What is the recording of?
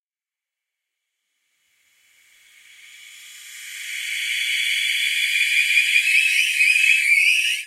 bambu*bird3 REV
a convolution of two files: a bird chirping and two bamboo sticks being slapped together. the result was then reversed...
bamboo, chirp